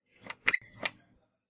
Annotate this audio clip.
Recording of a phone button that producte a whistle when it brands.